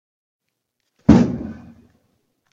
Bomb Exploding
Made with a washing machine (i hit my knee into it and it didn't even hurt)